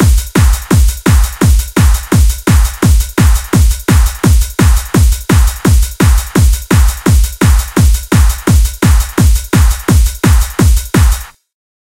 Rhythm 4 170BPM
With Kick Drum. Hardcore 4 x 4 rhythm for use in most bouncy hardcore dance music styles such as UK Hardcore and Happy Hardcore
hardcore, rave, 170bpm, rhythm